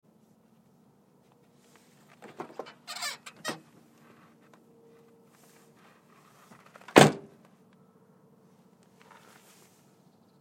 Car Door Opening SFX I used in a college project!
door, car-door-opening, car, opening